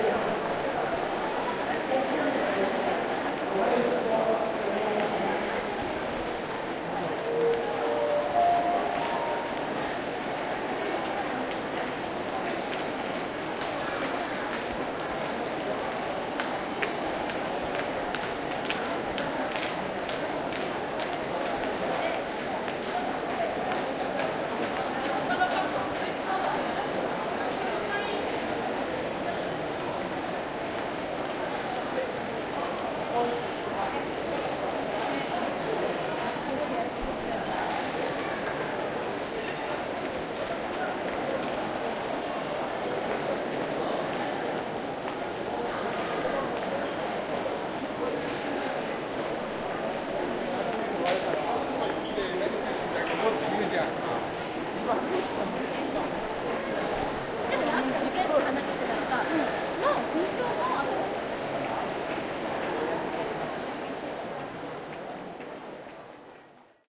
Ben Shewmaker - Busy Omiya
I recorded this on my 2004 trip to Japan. This is upstairs at Omiya trainstation on a busy Sunday afternoon. I recorded it with my Sony MZ-N707 MD and Sony ECM-MS907 Mic.
people, japan